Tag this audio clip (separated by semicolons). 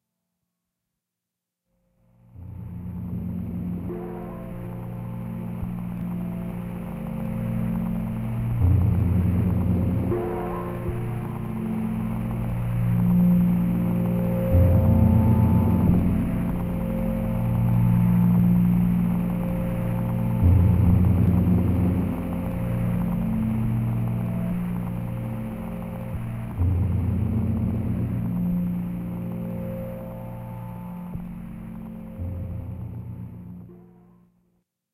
synth; sp404; microbrute; casio; sampler; sk1; dronesound; noise; drone; arturia